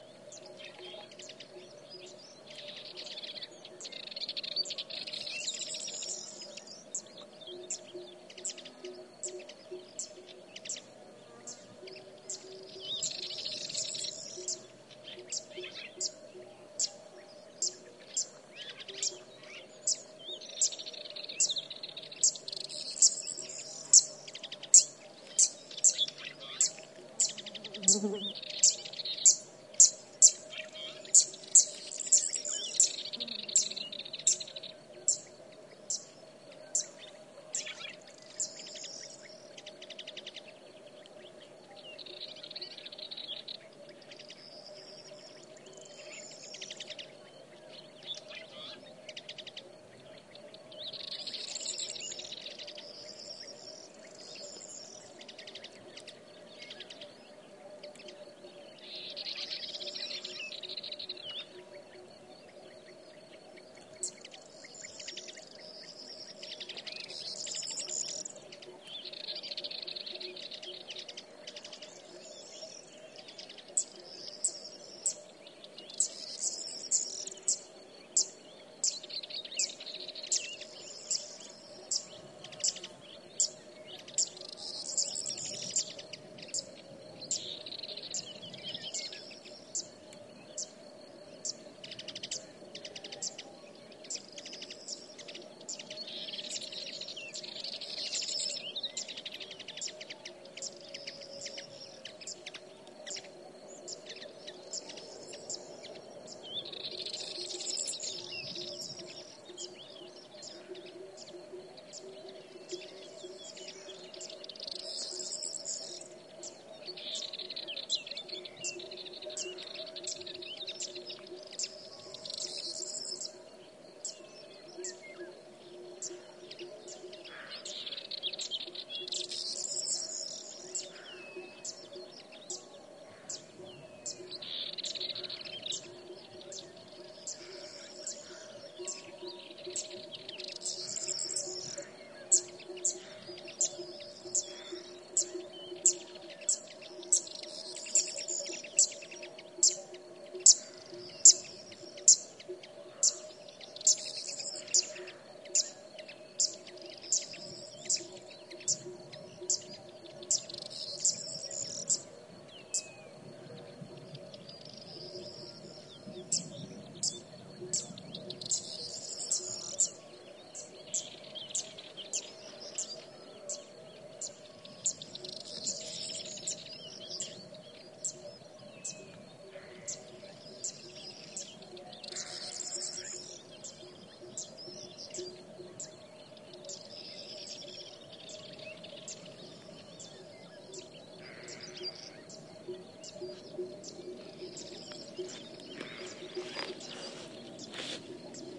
20130418 fan.tailed.warbler.07
Fan-tailed Warbler callings, along with other birds (Corn Bunting), insects, and cattle bells in background. Recorded near Castelo de Vide (Alentejo, Portugal), using Audiotechnica BP4025, Shure FP24 preamp, PCM-M10 recorder
alentejo, ambiance, birds, buitron, cisticola-juncidis, emberiza-calandra, field-recording, mediterranean-forest, portugal, spring, triguero, warbler